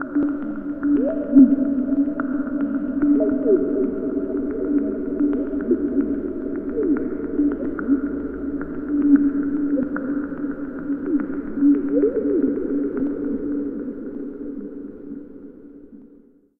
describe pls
hf-7307 110bpm Tranceform!
Another aquatic electronica interlude. Would do well in a worldbeat or chillout song. Made with TS-404.
loop, electro, electronica, interlude, chill